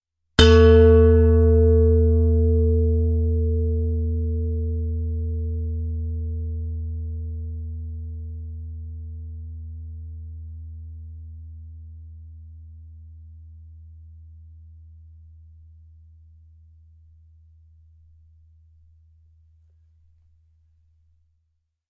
Stainless Steel Bowl 1
A stainless steel bowl struck with a wooden striker.
bell, bowl, ding, percussion, ring, stainless-steel